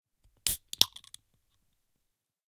can SFX
Open a energy/beer/soda can.
Recorded with Rode VideoMic on a Canon 700d.
beer, can, cola, drink, energydrink, ernergy, open, soda